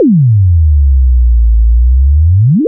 The Matrix Trinity Jump Sound FX (plain-single)
I've been looking for a similar effect for quite a few years and no one has built one. So, using a simple synth and bass drop worked wonders for what I needed.
The sound effect is from The Matrix and is the sound heard near the beginning when the police are attempting to arrest Trinity, just before the Agents get upstairs. When Trinity jumps, this is that effect.
Matrix, fx, Trinity, jump, sound, movie, sound-design, sound-effect, effect